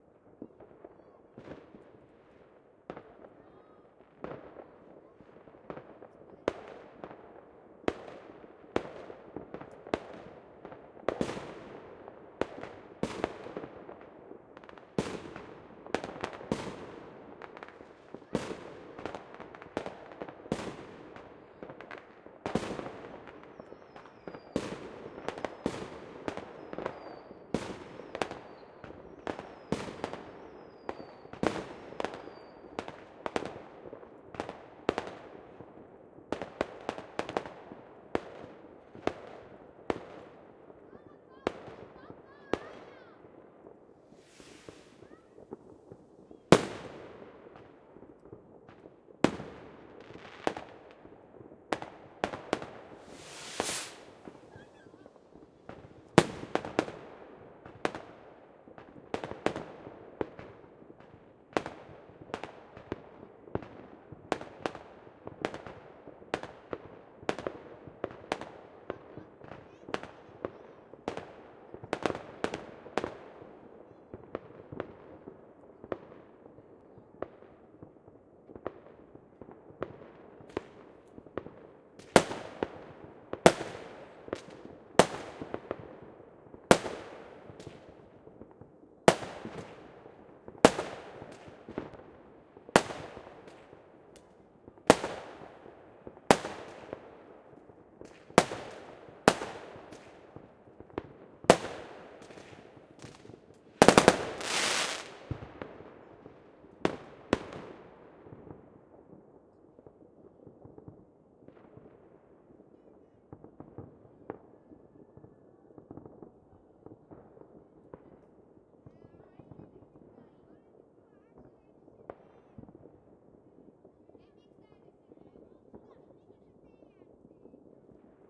Some smallish fireworks at semi-close perspective, not much cheering.
AKG C522, DMP3, M-Audio 1010, Ardour.
fireworks, new-years-eve, pyrotechnic